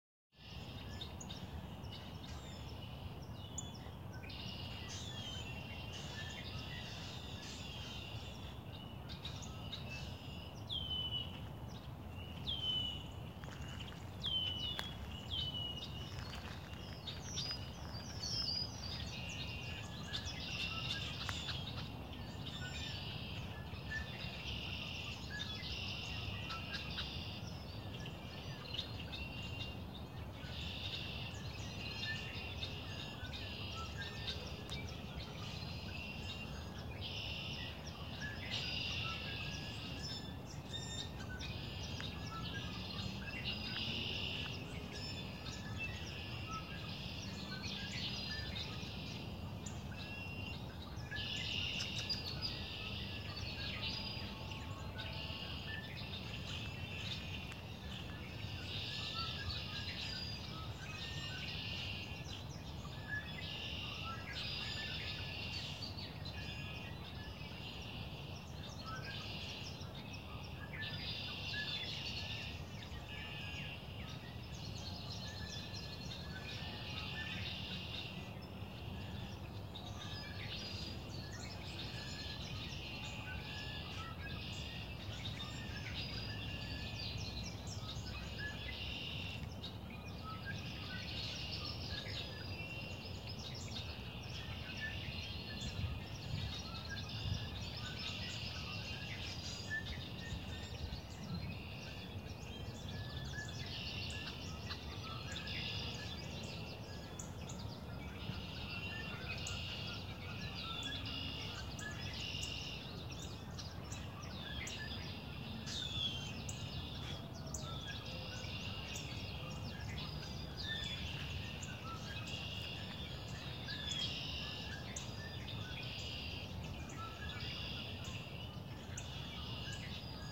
Great Meadows birds
A field recording of birds at dawn, July 2017, Great Meadows Wildlife Reservation, Concord MA. Used my phone.
dawn, field-recording, Massachusetts